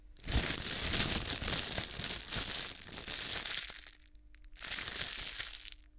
Crumpling plastic sheet
Crumpling a plastic sheet.
{"fr":"Feuille de plastique chiffonée 1","desc":"Froisser une feuille plastifiée.","tags":"feuille plastique plastifée froisée chiffonée"}
crumpling, sheet, manipulation, plastic